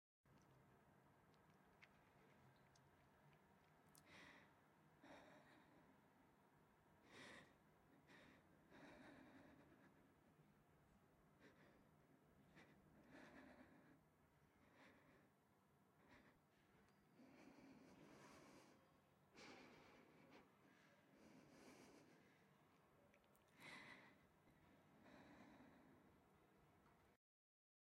Woman breathing recorded on set.
breathing, female, girl